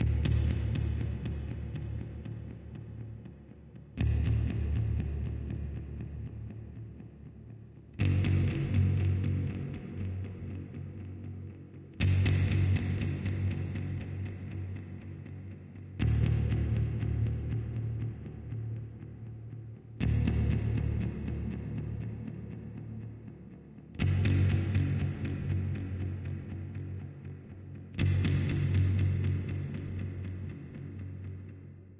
Suspense Bass
Play the note of D to F# on a fender musicmaster bass ran thru Axe-FX. Recorded @ 120BPM